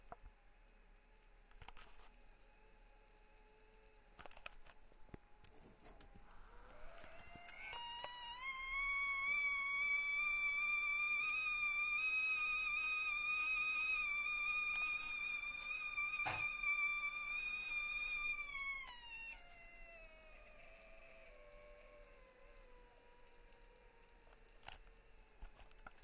Pressure cooker noise recorded with Edirol R-09 digital recorder.